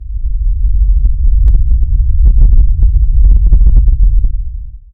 You can hear a very low sound that corresponds to an earthquake.